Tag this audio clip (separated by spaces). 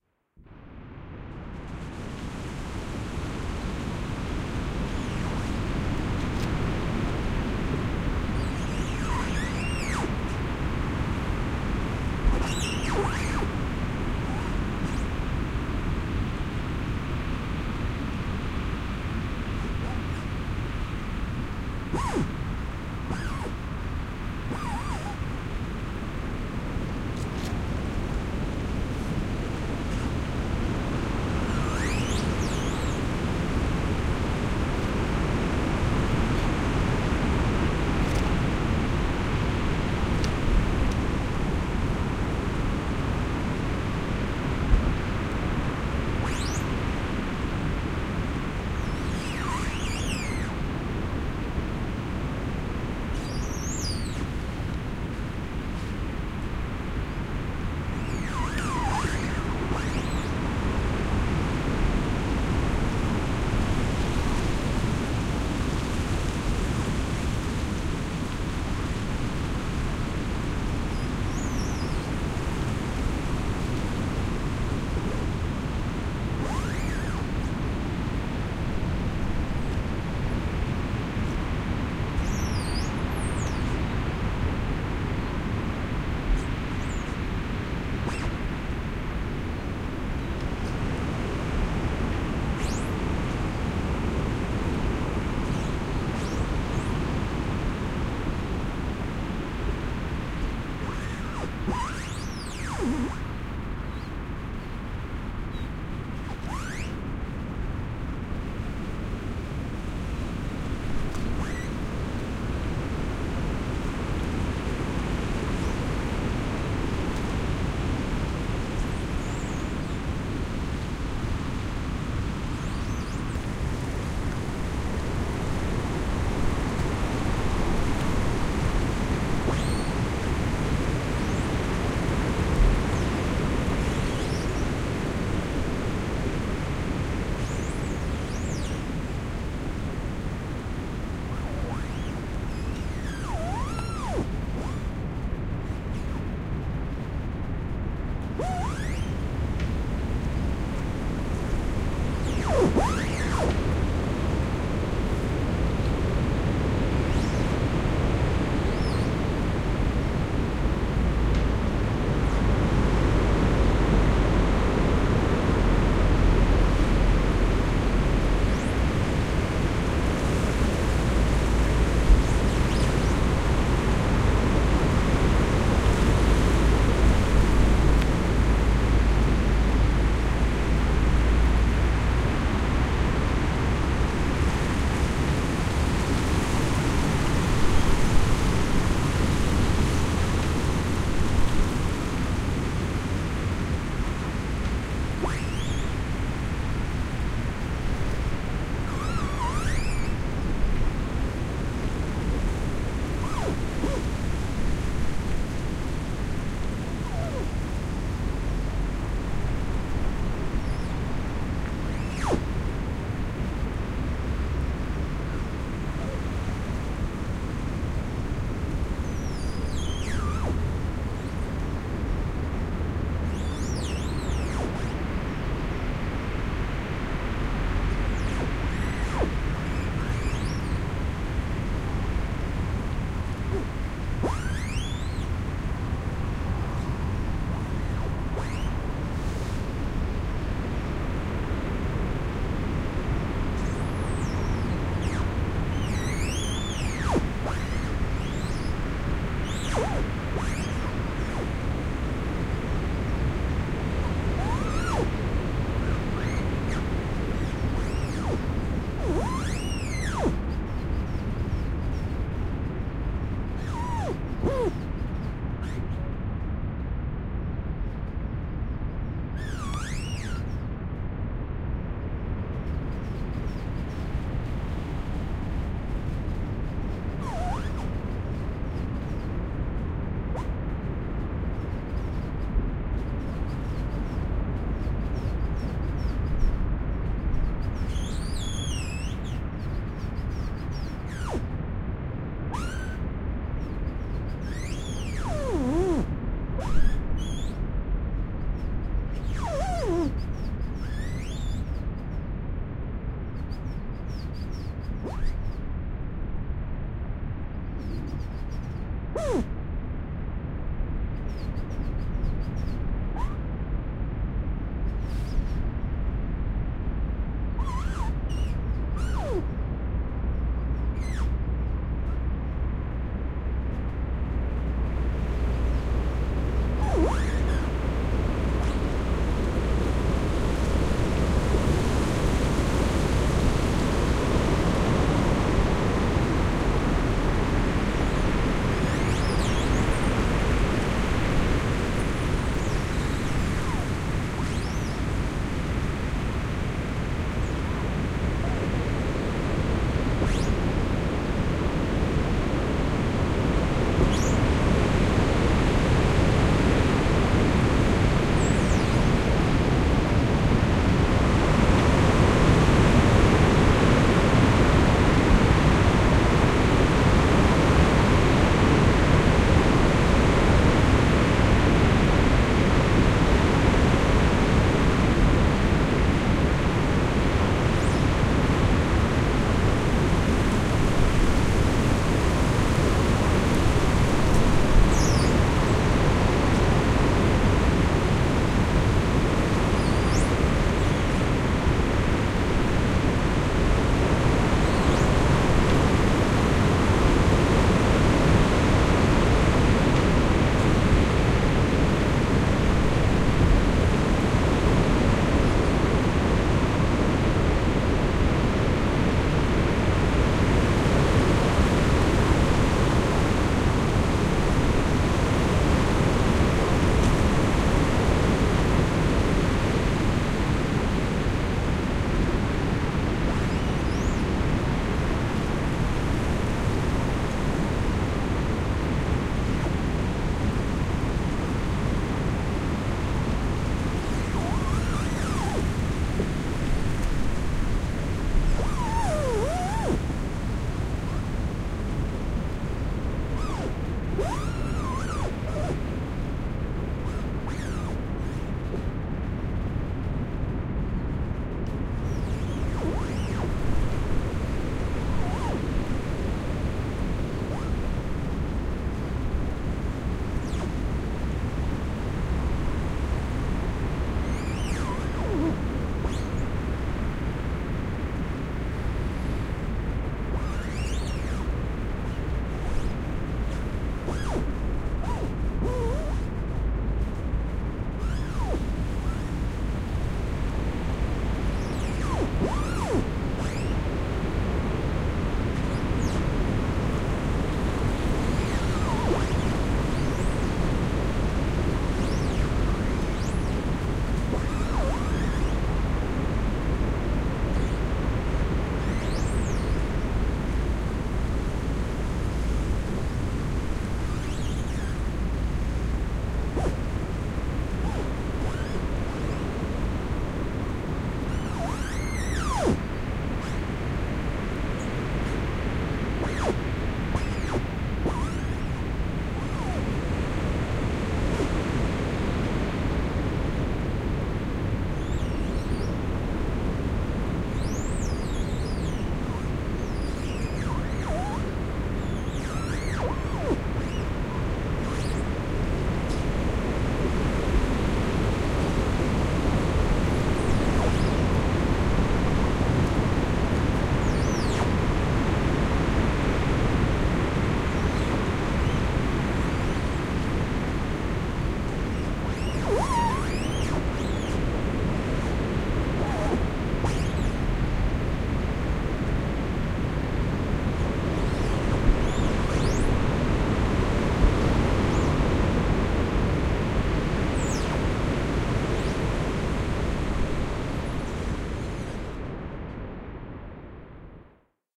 trees squeal wood creak squeak tree field-recording